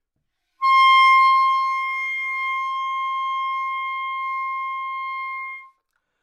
Part of the Good-sounds dataset of monophonic instrumental sounds.
instrument::clarinet
note::C
octave::6
midi note::72
good-sounds-id::1567
C6, clarinet, good-sounds, multisample, neumann-U87, single-note